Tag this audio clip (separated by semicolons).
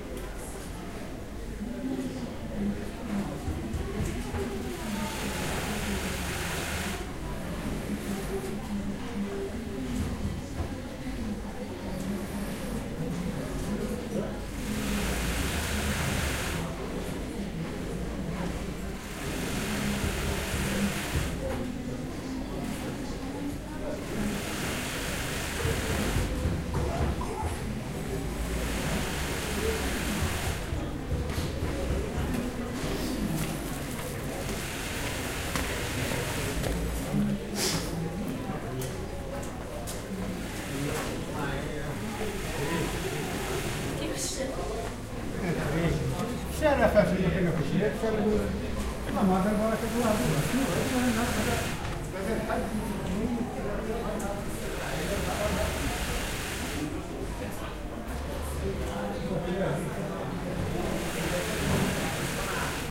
tailor; people; morocco; field-recording; street; voice